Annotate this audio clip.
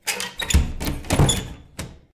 Door close inside04
Closing a wooden door with a squeaky metal handle. Natural indoors reverberation.
door, inside, squeaky